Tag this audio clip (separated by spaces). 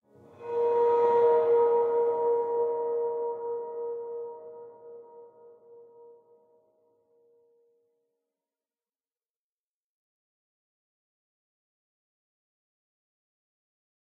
bow bowed chord electric guitar huge orchestral reverb soundscape spacey string violin